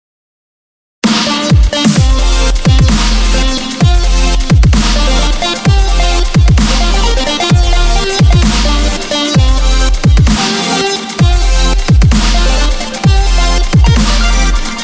Breather Loop
Another clip from one of my uncompleted tracks. Like all my loops, it is not even 50% finished and is for anybody to do as they wish.
bass, club, compression, delay, drums, dubstep, flange, free, hats, house, limiters, loop, reverb, sample, snare, sound, sterio, synths